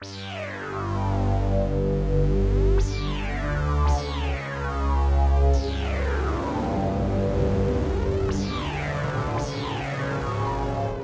TheDarkestBeat Keys 01 - Loop01
Sinister and foreboding synth line. Swelling analog modeled synthesizer
scary, dark, synth